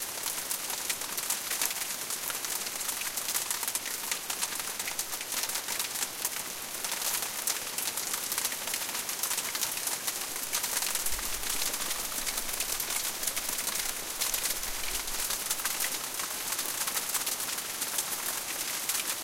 hail rain
Heavy hail with some rain
rain, weather, nature, winter, storm, hail, heavy